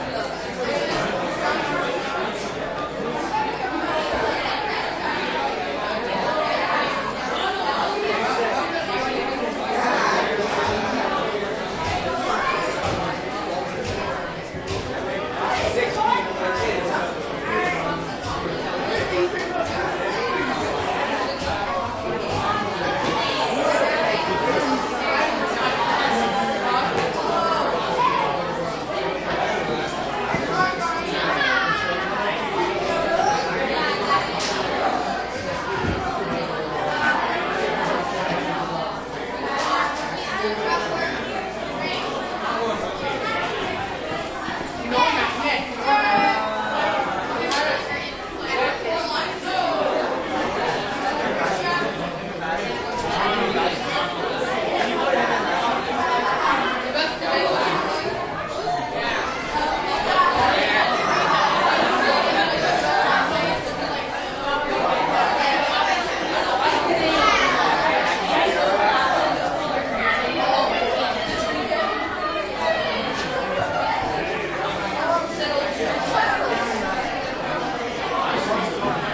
crowd int high school lounge busy students chatting hanging out1 Montreal, Canada
busy, Canada, chatting, crowd, high, int, lounge, school, students